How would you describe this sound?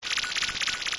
Flying Saucer
Alien, game, space